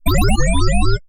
Toy Robot Blorping
"Warning, warning, I have hooks for hands and am likely to damage anything I touch." ~ Robot
retro, robot, lose, boop, breakdown, beep, videogame, video-game, game